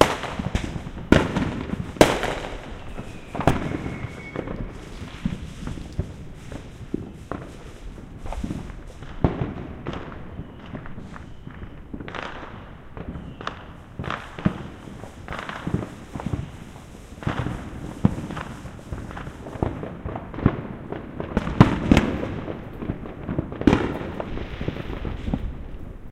Fireworks going off in various places within Santa Ana recorded with Roland CS-10EM Binaural Microphones/Earphones and a Zoom H4n Pro. No Post-processing added.
ambient, bang, binaural, binauralrecording, binauralrecordings, bomb, boom, exploding, explosion, explosions, explosive, fieldrecord, field-recording, fieldrecording, fire-crackers, firecrackers, firework, fire-works, fireworks, fourth-of-july, kaboom, loud, new-year, newyear, newyears, rocket, rockets